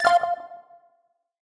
An synthesized user interface sound effect to be used in sci-fi games, or similar futuristic sounding games. Useful for all kind of menus when having the cursor moving though, or clicking on, the different options.